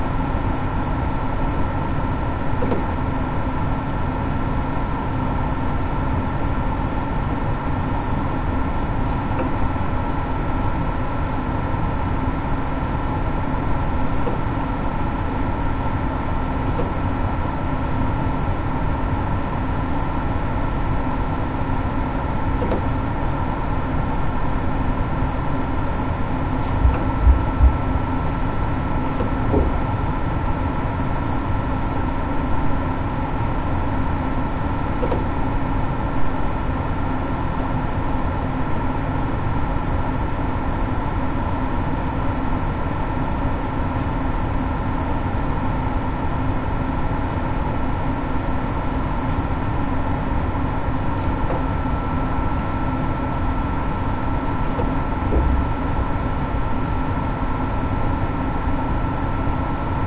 PC fans and hard drive closeup
Closeup recording of the backside of a tower PC, fans and the harddrive can be heard. Neighbor is banging around, you may need to loop a smaller part.
fan, PC